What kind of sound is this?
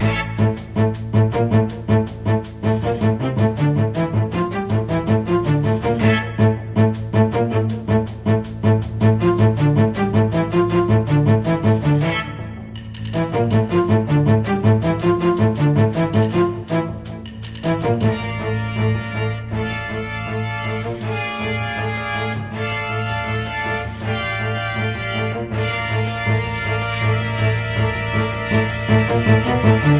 Fight loop
Music loop for the final boss fight - classic 90s style video-game.
video-games, console, arcade, final-boss, games